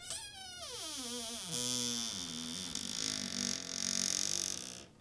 Another long creak, opening a cupboard very slowly.